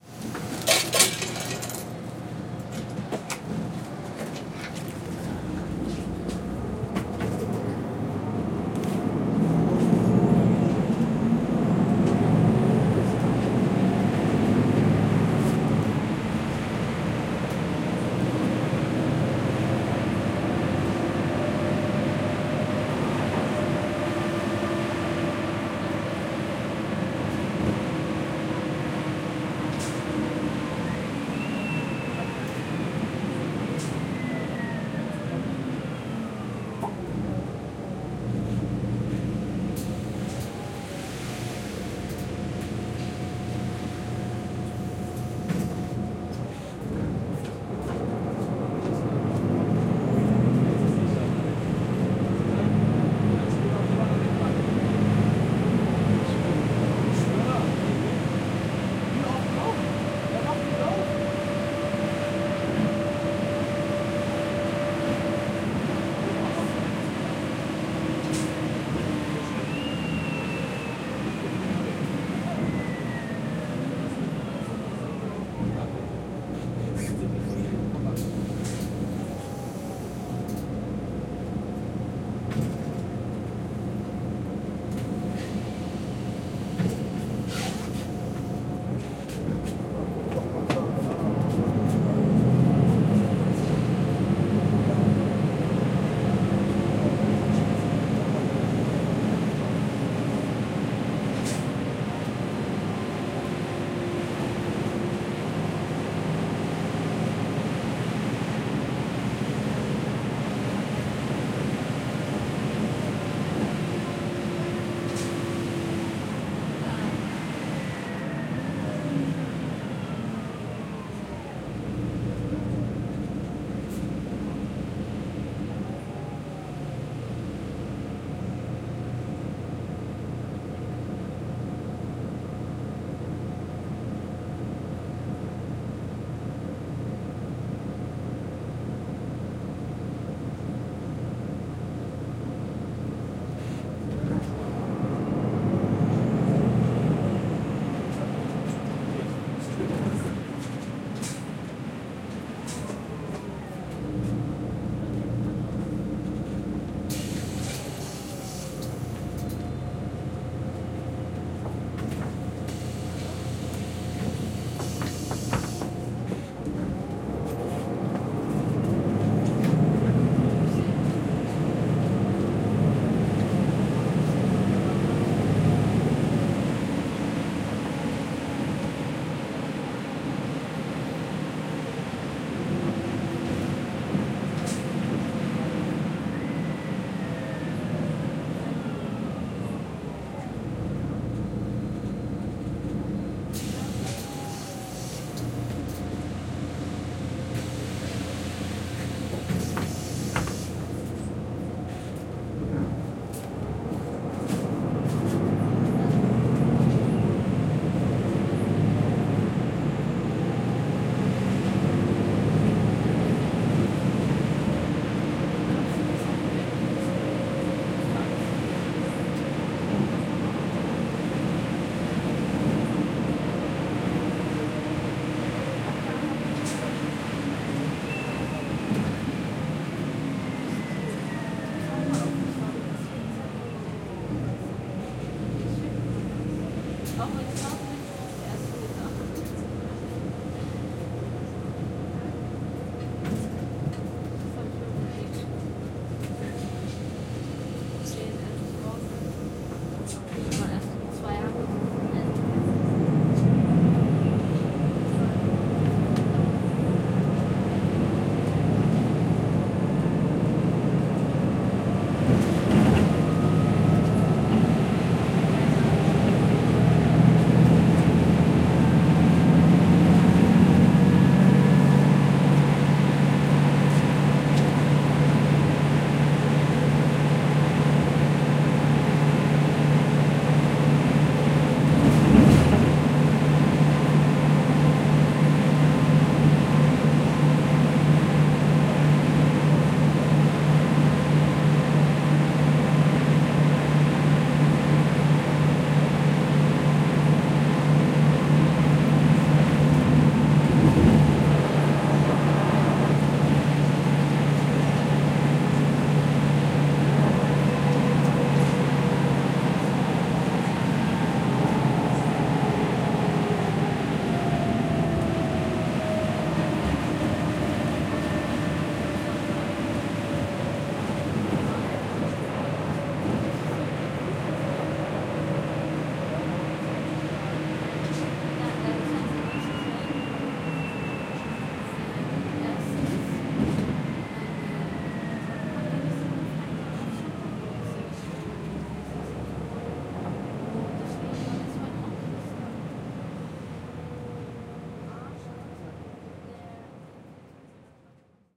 driving with city bus
bus travel, driving in city - bus
Recording: Tascam HD-P2 and BEYERDYNAMIC MCE82;